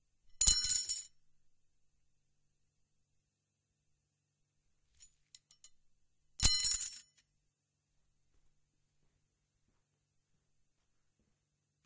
metal rods drop
metal rods being dropped
drop, rods, sticks, fall, metal